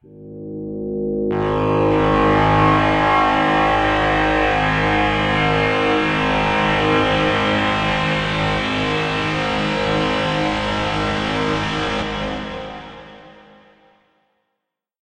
THE REAL VIRUS 07 - GIGANTIC - G#2
Big full pad sound. Nice evolution within the sound. All done on my Virus TI. Sequencing done within Cubase 5, audio editing within Wavelab 6.
multisample, pad